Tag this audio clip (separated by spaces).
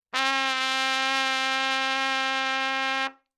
single-note good-sounds neumann-U87 trumpet C4 multisample